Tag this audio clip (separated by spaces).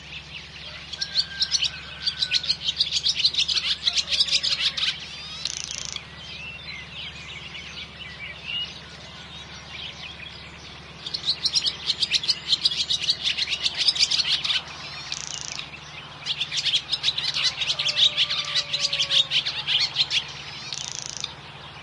birds spring ambiance